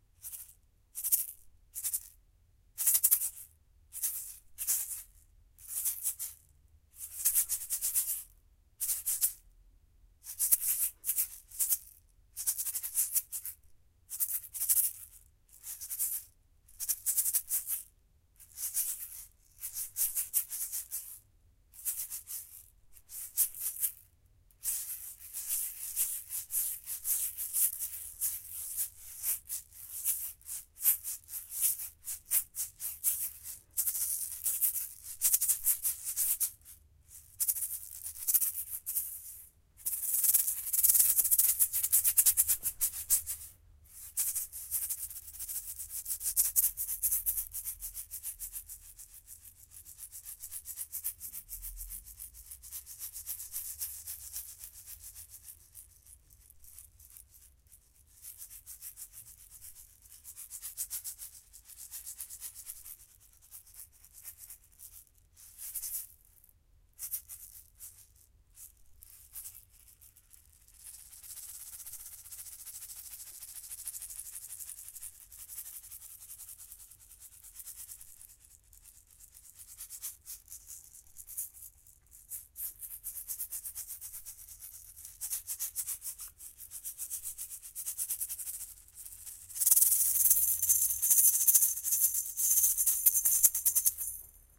Shakes recorded at different intensity and rythm.
maracas, percussion, percussive, shake, shakes, shaking